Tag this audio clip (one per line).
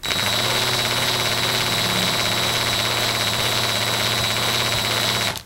Bang
Boom
Crash
Friction
Hit
Impact
Metal
Plastic
Smash
Steel
Tool
Tools